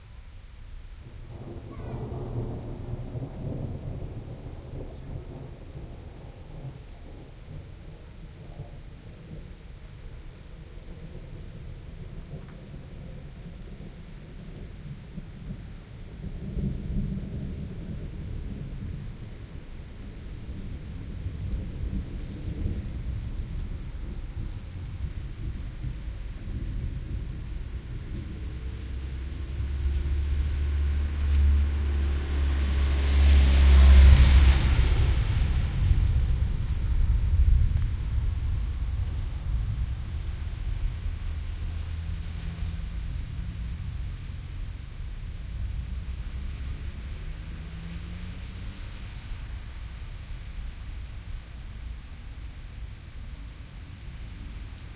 Only a short track, I dashed out to get this recording done, standing
at the door of my office. One can hear also a bloke cycling by and a
car passing by.
The recording was done with the Soundman OKM II and a Sharp minidisk recorder MD-DR 470H